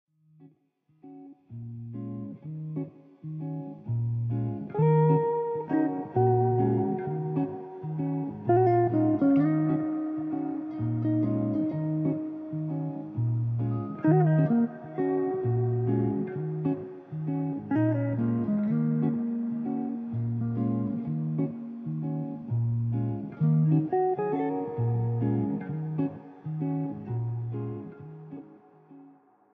Jazzy Vibes #78 - Jazz Guitar Medley
Acoustic, Acoustic-Guitar, Background, Chill, Clean, Cool, Electric, Electric-Guitar, Guitar, Instrumental, Jam, Jazz, Jazz-Band, Jazz-Guitar, Jazzy, Lead-Guitar, Mellow, Melody, Mood, Music, Relax, Rhythm-Guitar, Smooth, Solo, Soundtrack